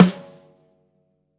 Snare we recorded. Sample. credits or a thank you is appreciated if used.